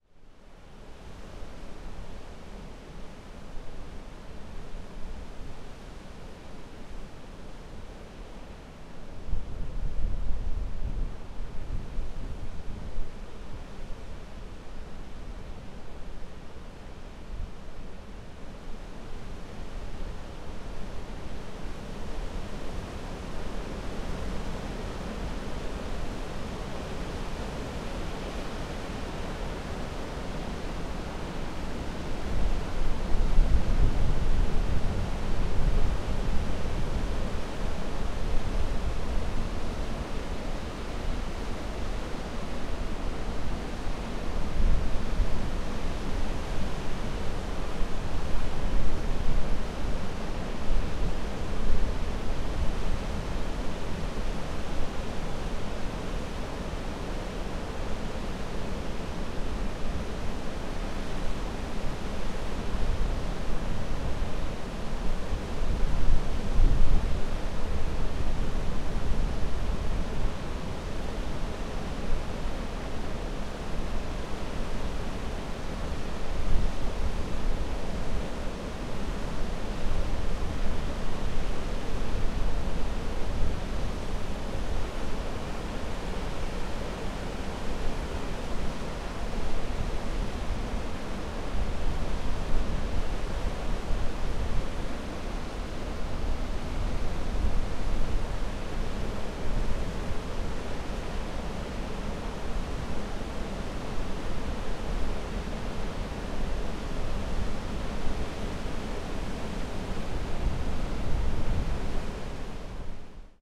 Þingvellir waterfall mellow 2
sound of waterfall in Þingvellir, iceland